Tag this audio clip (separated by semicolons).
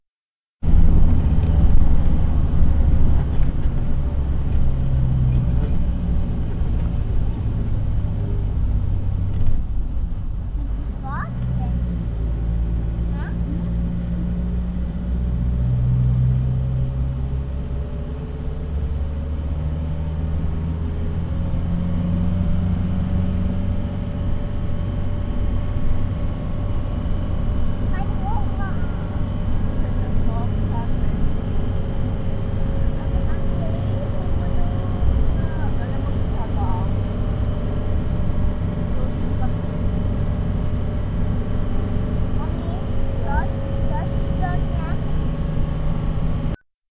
machines; street; temples; thailand